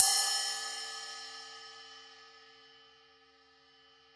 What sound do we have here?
RC13inZZ-Bw~v05
A 1-shot sample taken of a 13-inch diameter Zildjian Z.Custom Bottom Hi-Hat cymbal, recorded with an MXL 603 close-mic and two Peavey electret condenser microphones in an XY pair. This cymbal makes a good ride cymbal for pitched-up drum and bass music. The files are all 200,000 samples in length, and crossfade-looped with the loop range [150,000...199,999]. Just enable looping, set the sample player's sustain parameter to 0% and use the decay and/or release parameter to fade the cymbal out to taste.
Notes for samples in this pack:
Playing style:
Bl = Bell Strike
Bw = Bow Strike
Ed = Edge Strike
cymbal, multisample, velocity